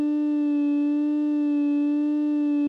The vowel “I" ordered within a standard scale of one octave starting with root.
formant, voice, speech, supercollider, vowel